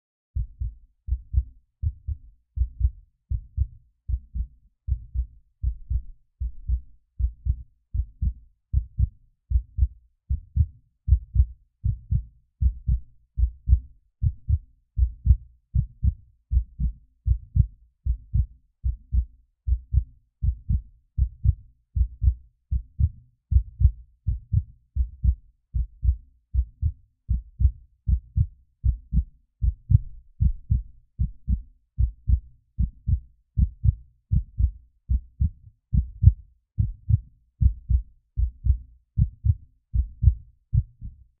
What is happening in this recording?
heart beat
This was produced by tapping on a stethoscope which had an earbud pressed against a Shure SM57 mic. Low pass filter applied, as well as compression and a gate. Chorus added. Used a recording cassette deck as a preamp going into an M-Audio Audiophile USB soundcard.
Note: If you're having problems listening to this clip, the cutoff frequency of your speaker set may be too high(solution: new speakers). The signal strength exists almost entirely in the very low frequencies, so you may need a sub-woofer to hear it. Otherwise, try turning your speaker volume all the way up. Doing so may saturate the signal and at least allow you to hear the harmonics of the signal caused by the distortion. I don't recommend it, but you'll at least maybe be able to hear something.
heartbeat, heart, beat, low-frequency